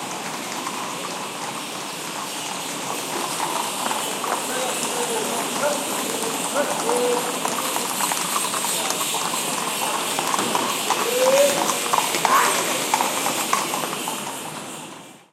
horse-drawn carriage (with bells) passes by, voices
south-spain, horse-bells, field-recording, ambiance, seville, city